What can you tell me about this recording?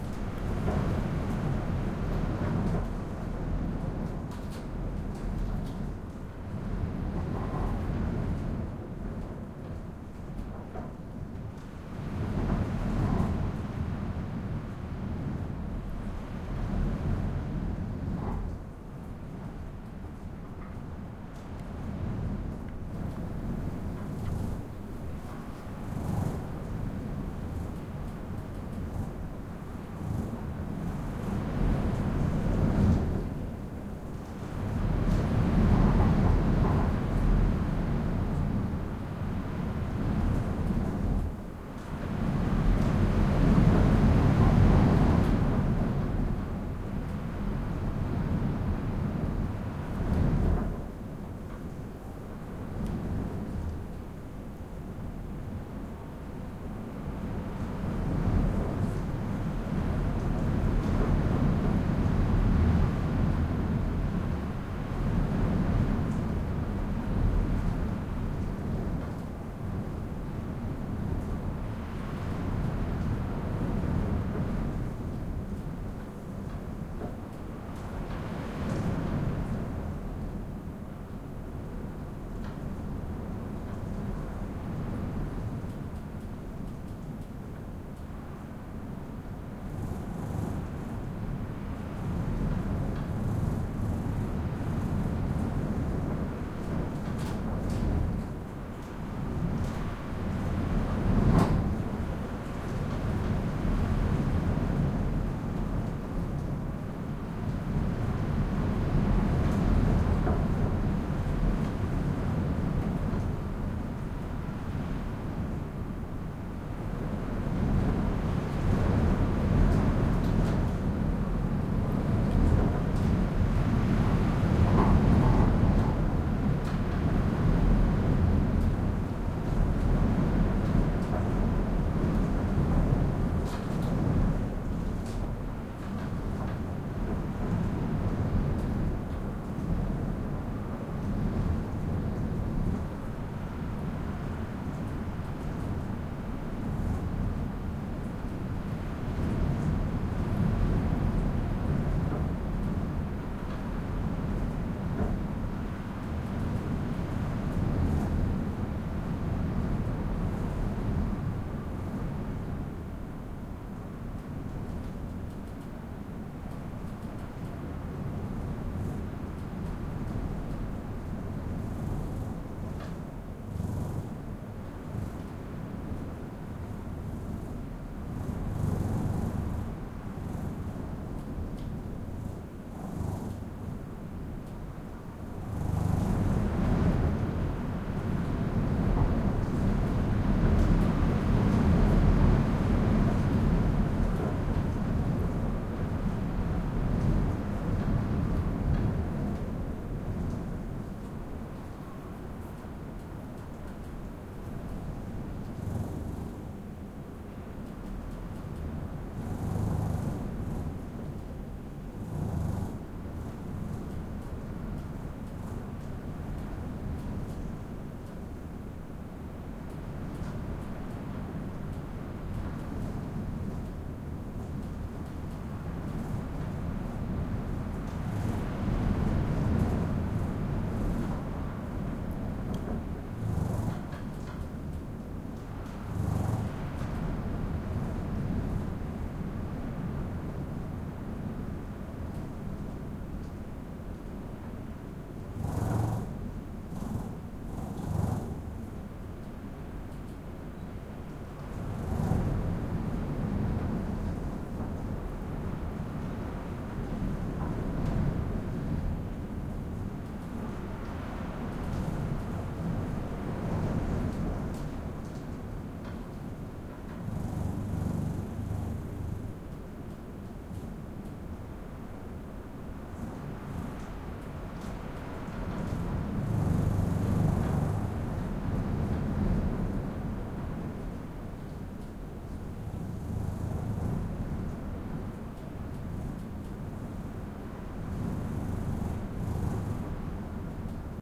heavy wind battering hotel room curtains rattle against window and ceiling beams vibrate and grains of sand on roof1 from bed Gaza 2016
heavy, room